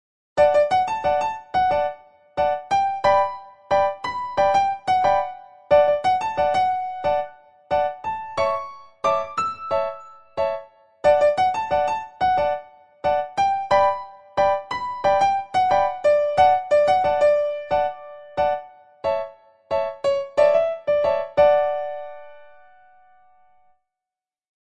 13-Armonización de una melodía en escala mayor con las funciones principales (D)
Some chords D
Chords, Midi